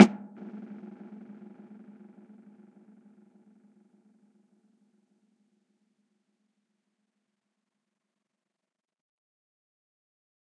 EFX Snare 2
A dry snare with effects.
1-shot, drum, effects, snare